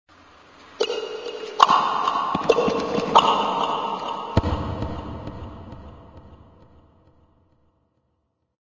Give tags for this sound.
Scary; Strange; Creepy

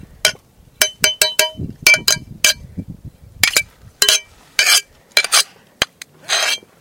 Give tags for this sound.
glass; scrape; scraping; tap; tapping; tinkle

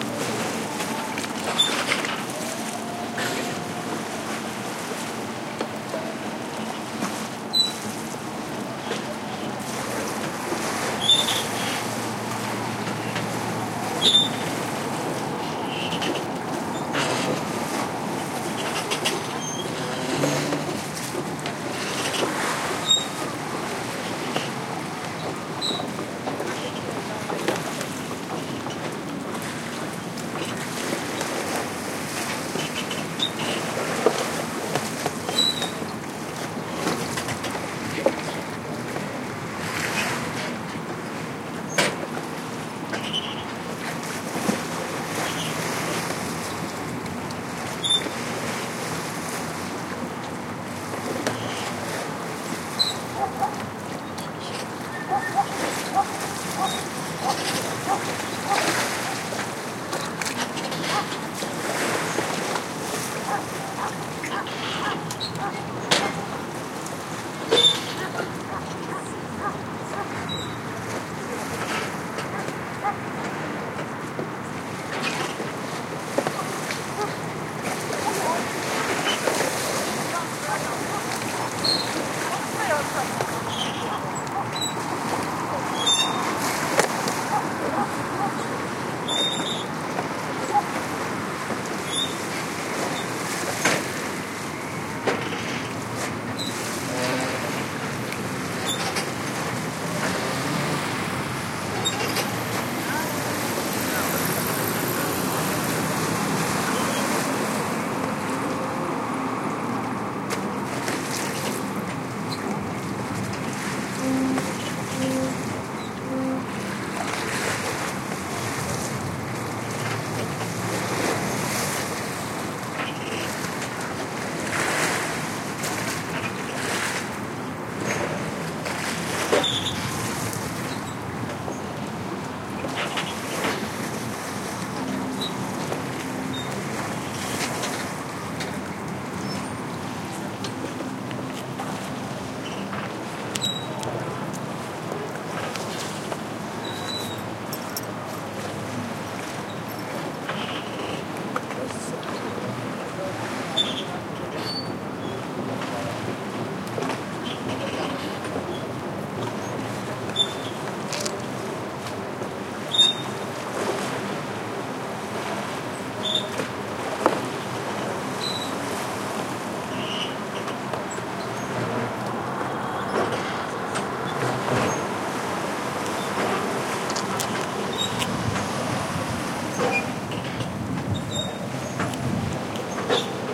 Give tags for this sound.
ambiance; city; dock; field-recording; screeching; stockholm; traffic; waves

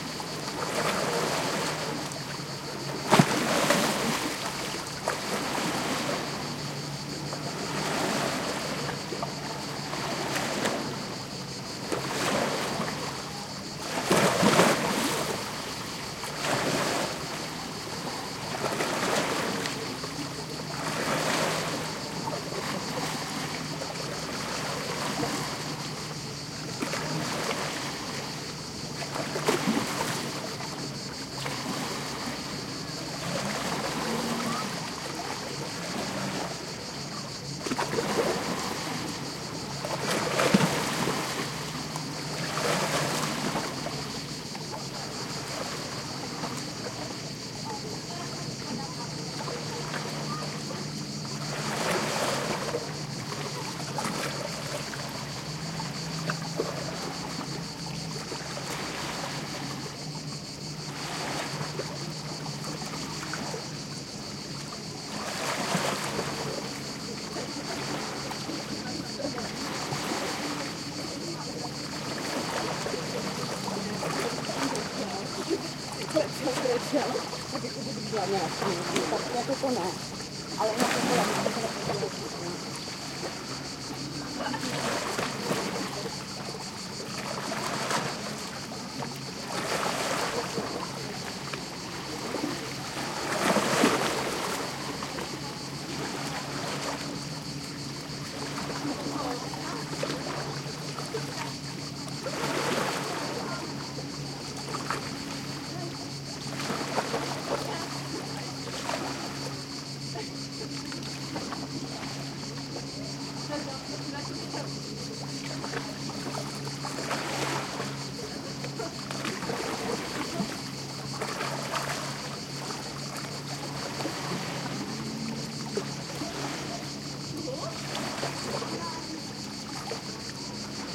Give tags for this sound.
field-recording; beach; crickets; lapping; close-range; sea; water; loud; people; shore; atmo; maritime; waves

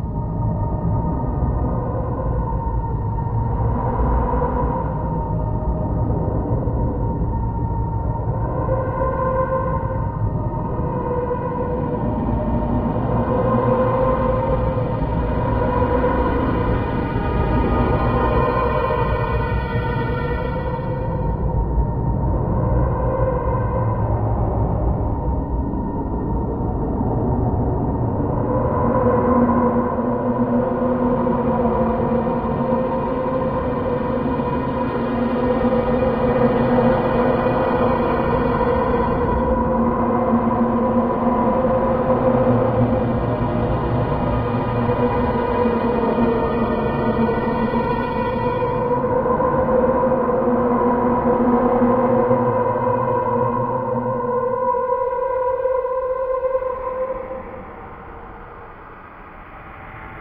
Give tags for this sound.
creepy; haunted; horror

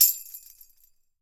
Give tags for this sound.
sticks; tambour; Tambourine; percussion; chime; percussive; hand; drums; rhythm; chimes; orchestral; drum

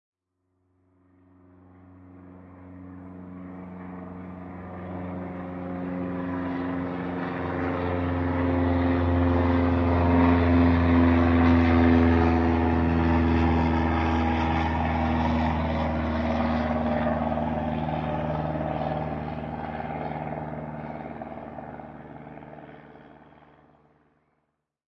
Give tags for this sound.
aeroplane; plane

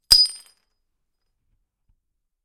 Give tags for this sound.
aluminum; clank; concrete; metal; metallic

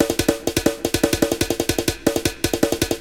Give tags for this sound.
breakbeat; brushes; drums; programmed; syncopated

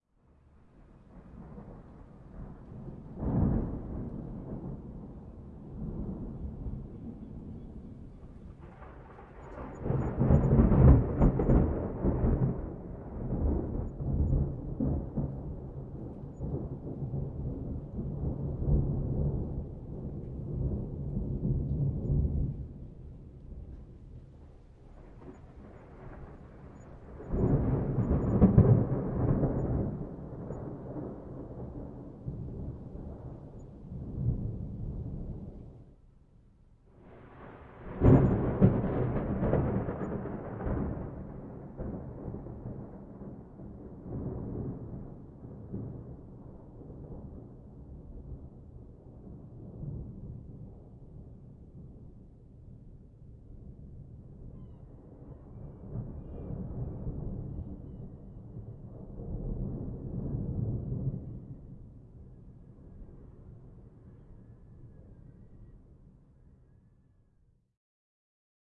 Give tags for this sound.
crack; field-recording; lightning; thunder; weather

